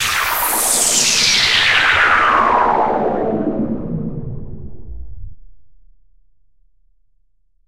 Resonance fall from Sylenth 1